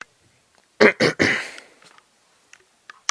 Clearing throat #2
A person clearing his throat to get someone's attention.
clearing-throat, clear, throat